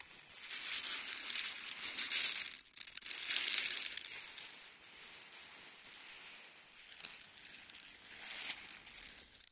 prise de son de regle qui frotte